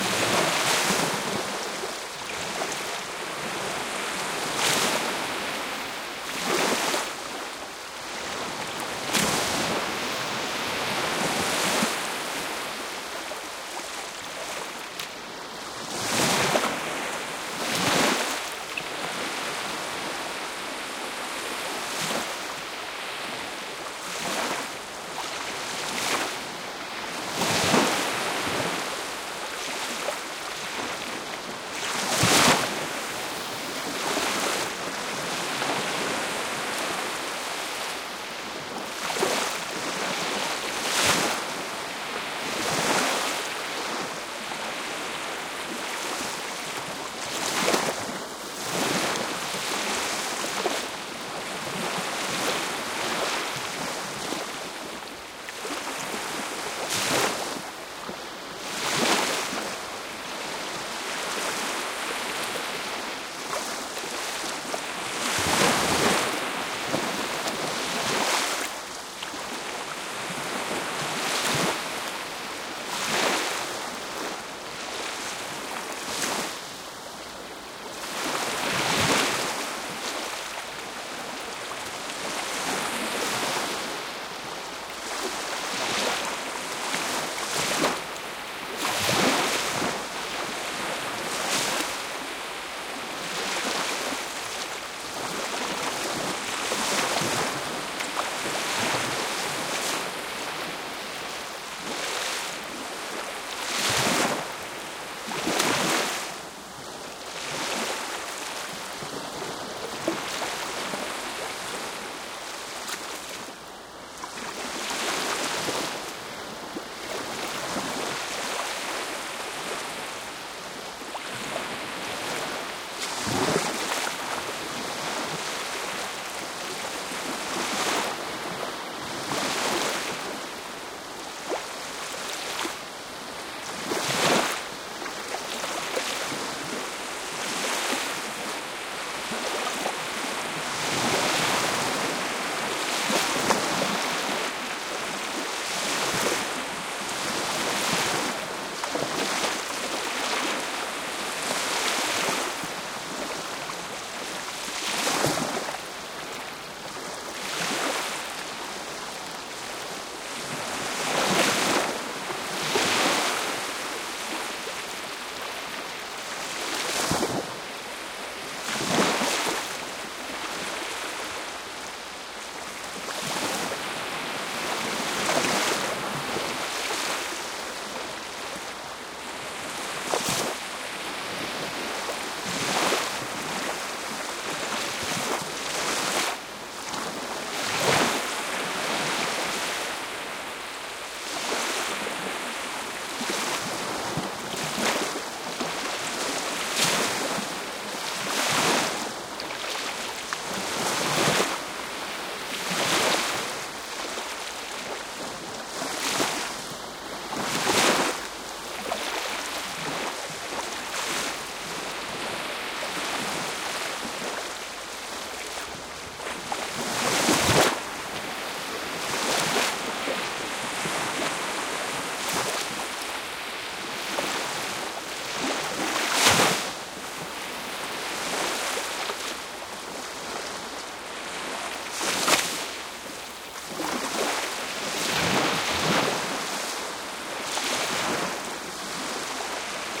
This sound effect was recorded with high quality sound equipment and comes from a sound library called Baltic Sea which is pack of 56 high quality audio files with a total length of 153 minutes. In this library you'll find various ambients recorded on the shores of the Baltic Sea.